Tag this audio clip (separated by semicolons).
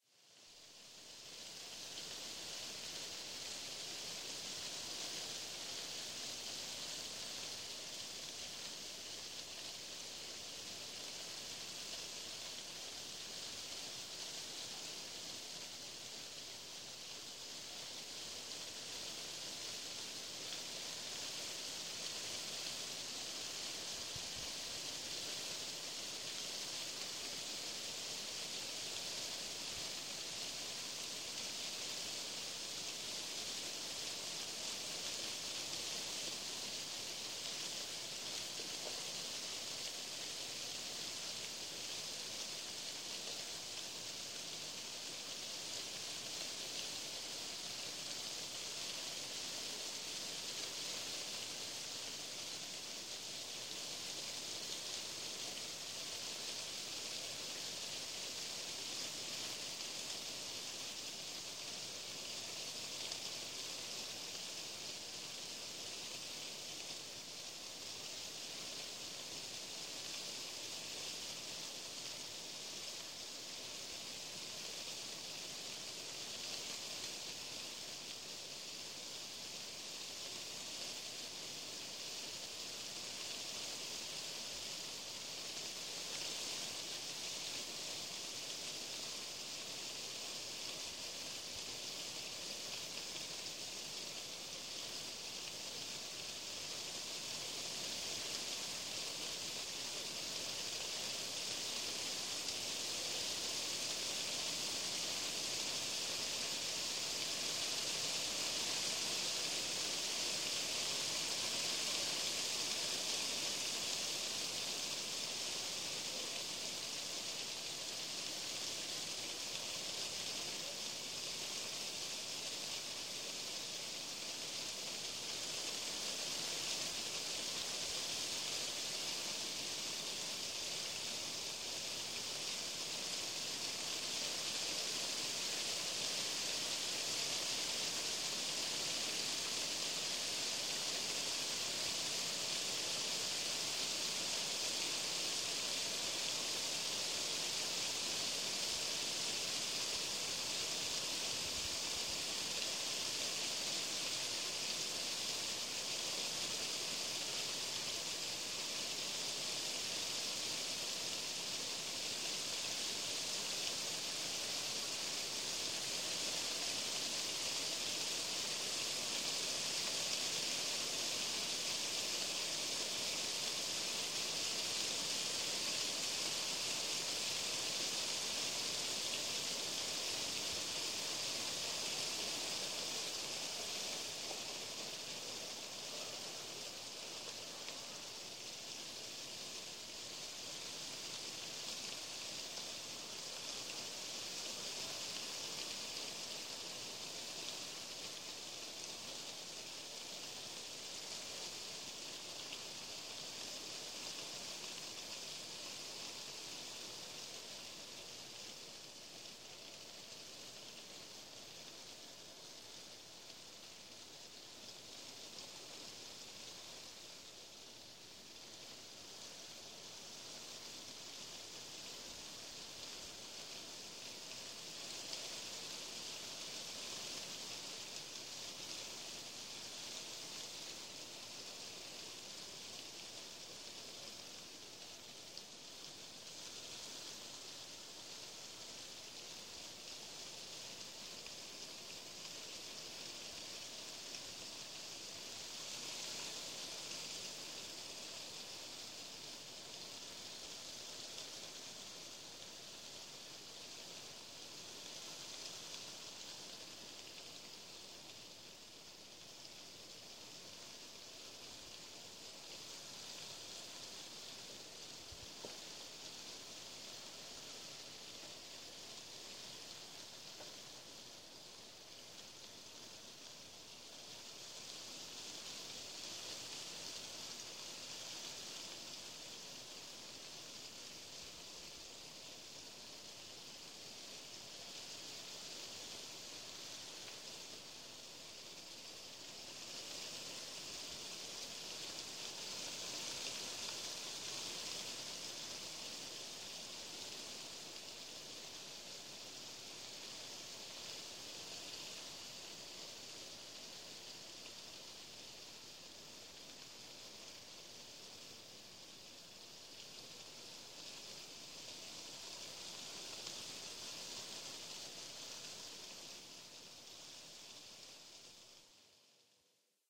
ambient; atmos; atmosphere; night; nightscape; soft; soundscape; wind